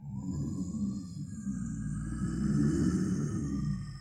Monster Growl
I recorded me growling and edited the background noises. I added a reverb for that movie feel.
growling, growl, scary, creature, beast, low, monster, horror, roar, monsters